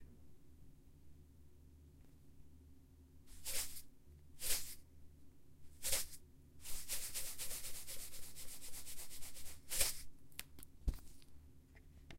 Light Turned On
Light being Turned On
Light, On, Turn